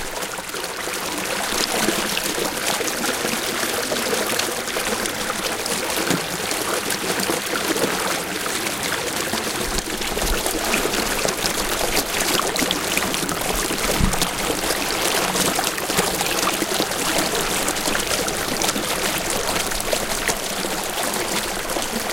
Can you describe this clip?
Just sounds of water rushing through a small space of rocks at Mckinney Falls State Park outside Austin, Texas. There is a little mic handling noise in this clip. The ambient air temperature was about 90 and the water temperature was probably around mid 80s. There was little to no wind and the humidity was around 25-30%.
Recording chain: AT822 microphone -->minidisc player
park, rolling, texas